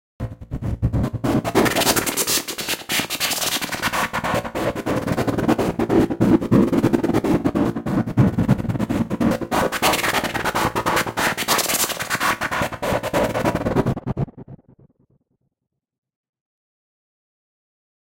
psy glitch noise
Flanger with fast delayed white noise and modulated cut off. 145 bpm
glitch, goa, lead, noise, psy, psychedelic, trance, white